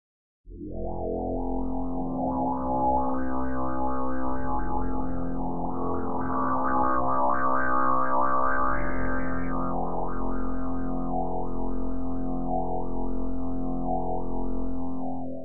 Diadow Drone 1
Heavily processed VST synth sounds using various filters and reverbs.
Atmosphere, VST, Filter, Reverb, Drone